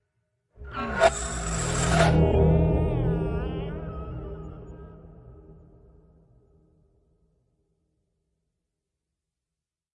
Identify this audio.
Metal and oxide texture.
texture of metal.